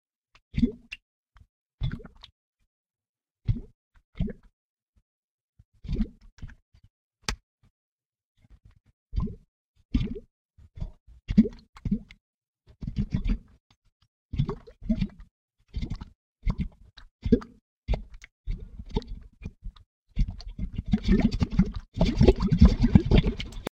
Wet BigBubbles
This is part of the Wet Sticky Bubbly sound pack. The sounds all have a noticeable wet component, from clear and bubbly to dark and sticky. Listen, download and slice it to isolate the proper sound snippet for your project.